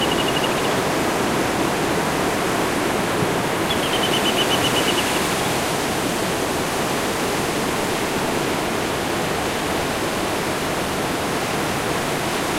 Pajaro sobre el mar +lowshelf
Mar grabado en movimiento desde la orilla.
Sea shore recorded in movement.
sea, ave, pajaro, bird, ocean, mar